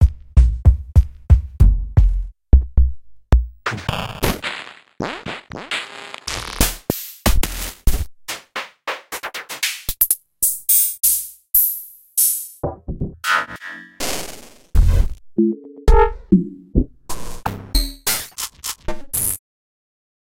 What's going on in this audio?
Drum/Percussion Reel for Morphagene MakeNoise